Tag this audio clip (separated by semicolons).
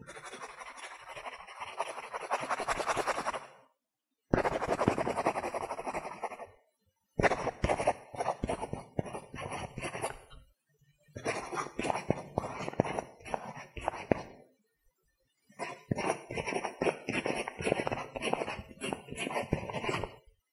paper; writting; pencil